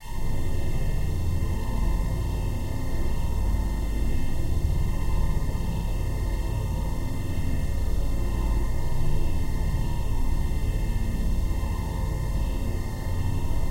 Viral Circular Sawshine
Glitched layers of synths and strings
buzzing, shrill, strings